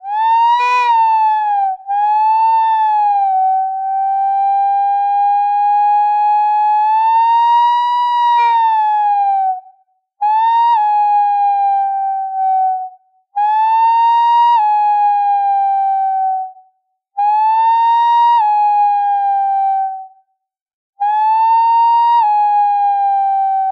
I made this sound in a freeware VSTI(called fauna), and applied a little reverb.